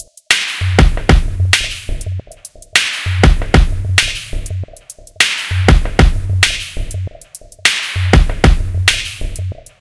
abstract-electrofunkbreakbeats 098bpm-toctoc
this pack contain some electrofunk breakbeats sequenced with various drum machines, further processing in editor, tempo (labeled with the file-name) range from 70 to 178 bpm, (acidized wave files)
abstract, beat, breakbeats, chill, club, distorsion, dj, dontempo, downbeat, drum, drum-machine, electro, elektro, experiment, filter, funk, hard, heavy, hiphop, loop, percussion, phat, processed, producer, programmed, reverb, rhytyhm, slow, soundesign